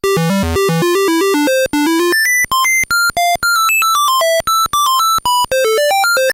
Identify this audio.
Akon 1 Pattern 4
drumloops, cheap, glitch, gameboy, nanoloop, videogame